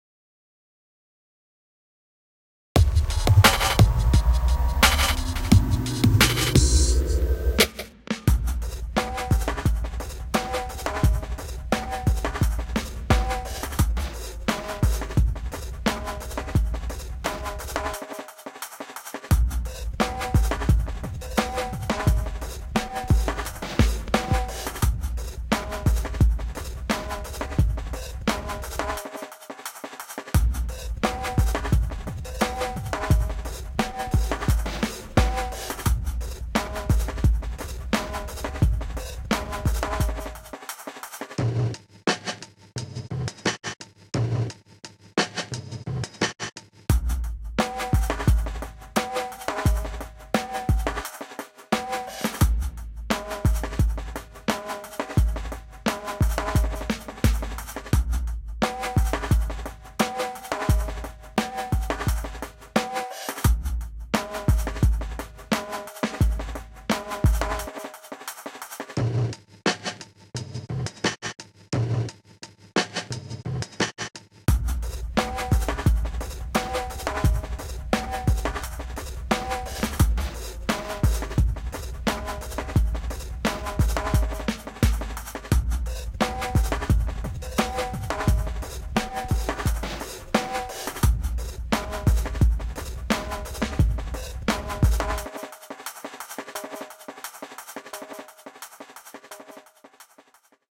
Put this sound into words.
Blame The Kush
I love kush...and beats that are at 87 BPM and sound like this!
87, beat, bitcrush, bpm, dnb, dub, electronic, good, hip, hop, kit, kush, rap, snickerdoodle